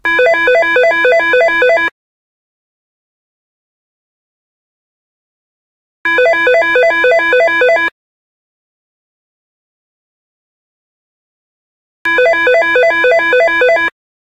The sound of a telephone ringing. It's an old Northern Telecom phone from the late 1990s. Amplification but no compression used. Audacity shows the ring tones as square waves.
telephone, telephone-ringing, ringing, square-wave, marantz-pmd620, audio-techica-pro24-mic